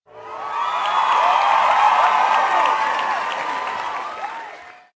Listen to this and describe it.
Content warning
The sound of the cheer when their team win.
football, win, won